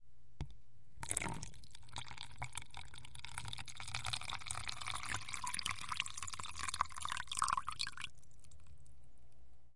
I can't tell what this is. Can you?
Pouring Water 04
Someone pouring water.
liquid, Pouring